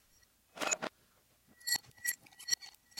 agudos vaso alreves
weird sounds in reverse
glass, reverse